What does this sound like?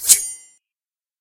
Unsheathe Blade
sound made from unsheathing a pizza cutter!
sharpen, blade, shhing, unsheathe, spike